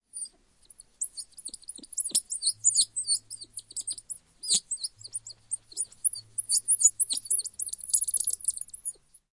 Sound of birds
whistle, pio